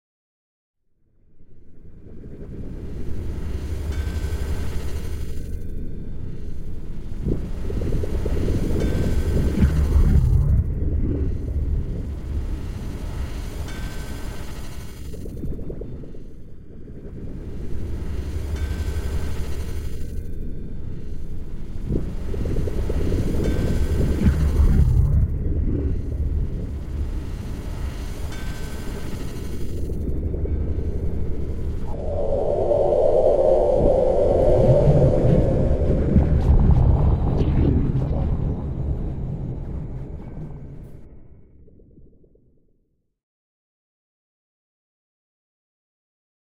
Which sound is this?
a sound from underwater depths? a submarine? a metallic object hitting a sea-rock? this is an excerpt from experiment in modeling underwater sound environment and underwater listening experience. no recordings were used here. main sound generators for the underwater effect were frequency-modulated sinewaves with carefully tuned amplitude envelopes. these went through a fluctuating reverb effect and a custom-designed FFT-based filter. the repetitive metallic sound on top is basically whitenoise through a bank of bandpass filters, with some varying feedback leakage, delay and some other tweakings.
artificial-space, competition, effect, sound-design, synthesis, water